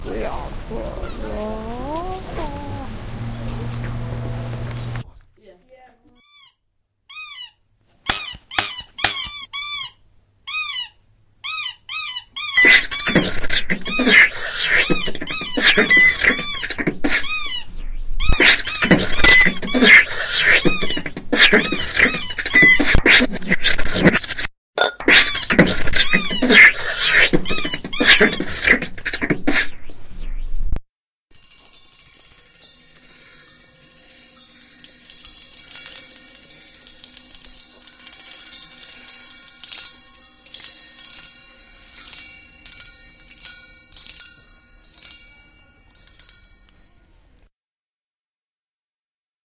SonicPostcard HD Ben&Xan
Here is Ben & Xan's composition. It is also a almost a combination of an abstract piece of sound art and the a sonic postcard concept. I think they have used some of their mySounds in here which was not the idea, but regardless, it is a really interesting mix of sounds, some of which have had effects put on them and others which don't. Have a listen and see what you think. Can you identify the sounds?
Ben, humprhy-davy, UK